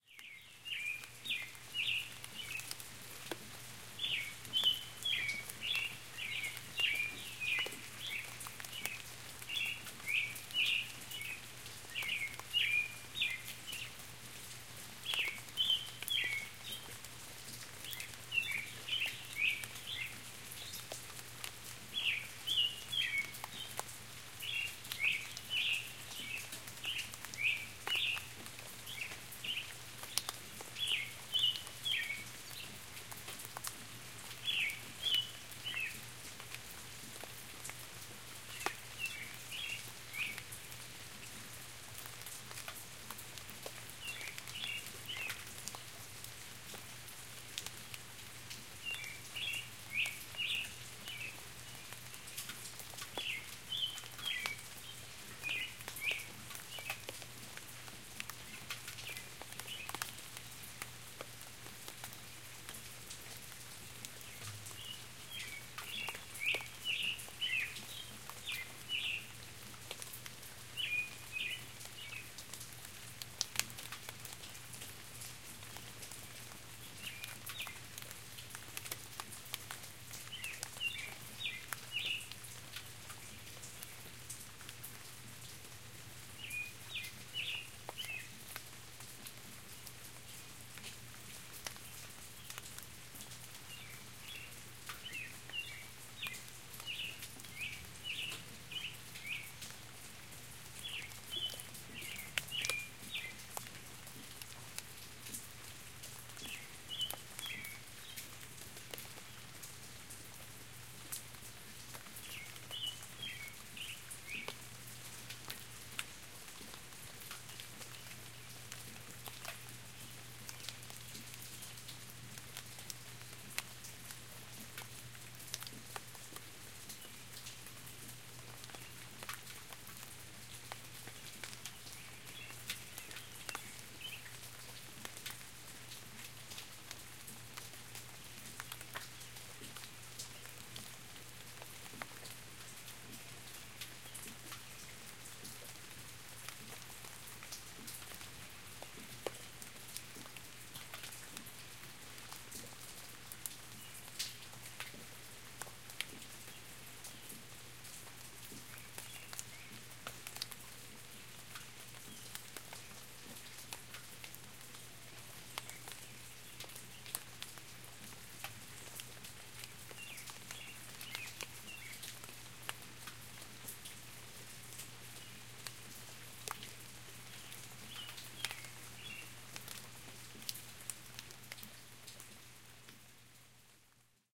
The exciting thing about recording and cataloging natural sounds is how you get nice, unexpected surprises.
I was up early recently one spring day and did not have any real plans to record, until I stepped outside with my coffee to see what the weather was like and was nicely surprised with the light, steady spring rain that was falling in my yard; during the whole time this energetic Carolina Wren kept on singing through the rain. I ran, got my recorder and recorded a full hour of this peaceful pattering. You hear the drops hitting the concrete patio, the shingled overhang and a plastic chair. Zoom H4N recorder using the stereo built-in mics.